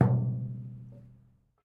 Metal container hit soft
Softly hitting a metal container
container, hit, metal, soft